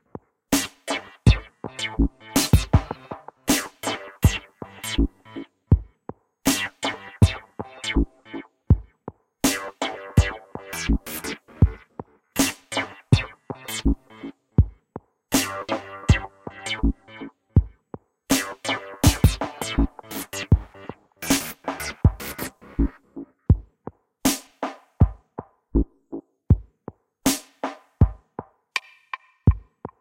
a little loop, with drums and synth, made with Ableton 5